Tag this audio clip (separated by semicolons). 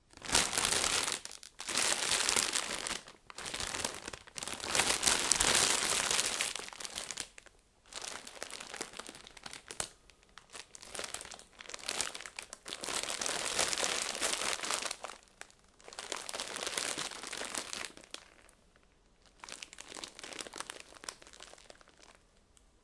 bag; chip; crumple; plastic; rustle; rustling